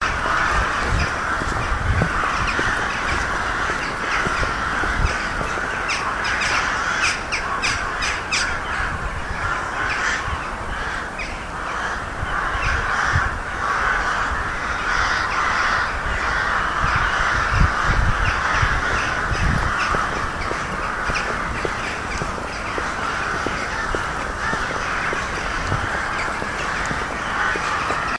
Crows and Rooks 1
Rook and Crow call under the nesting area of many Rooks